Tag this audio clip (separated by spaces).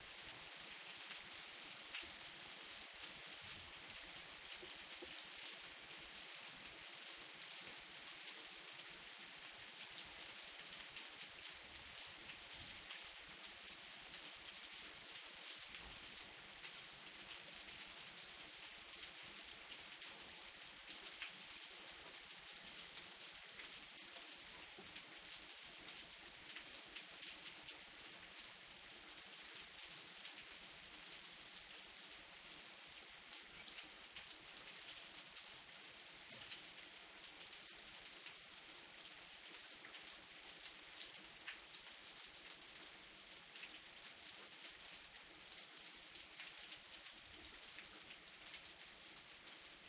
rain weather